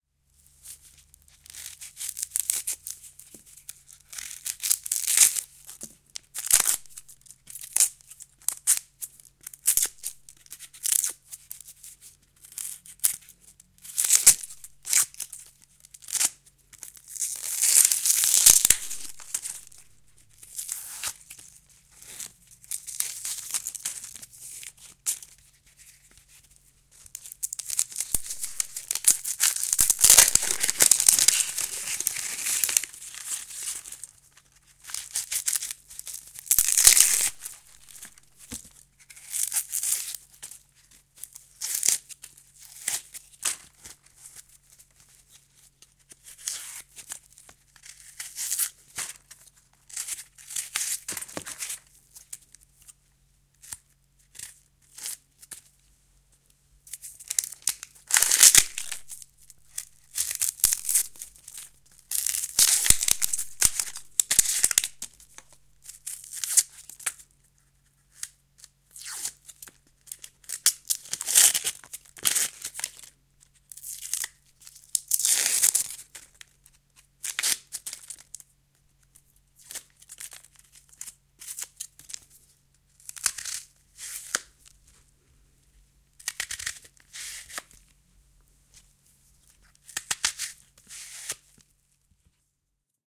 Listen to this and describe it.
Peling Onions
Primo, vegetables, LM49990, chef, EM172